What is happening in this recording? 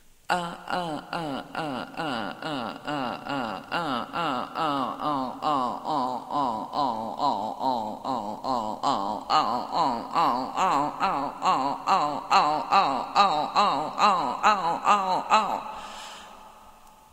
OU long
female, ou, talk, vocal, voice